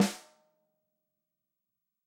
dry snare rim 05
Snare drum recorded using a combination of direct and overhead mics. No processing has been done to the samples beyond mixing the mic sources.
multi, snare, drum, real, velocity, stereo, instrument, acoustic, dry